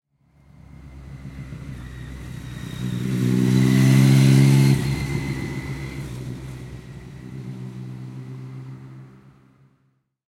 Sound of motorcycle passing on a road in South of France, near Mont Ventoux. Sound recorded with a ZOOM H4N Pro and a Rycote Mini Wind Screen.
Son de moto sur une route du sud de la France, près du Mont Ventoux (Vaucluse). Son enregistré avec un ZOOM H4N Pro et une bonnette Rycote Mini Wind Screen.
bike, chain, engine, mont-ventoux, moto, motor, motorbike, motorcycle, ride, rider, riding, south-of-france, vaucluse, wheel